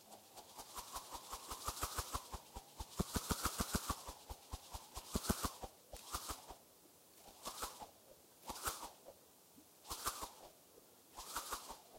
Corriente de aire producida por una cuerda